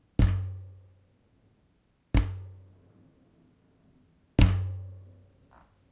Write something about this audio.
tire thump
Bicycle tire hits
bicycle, thump, tire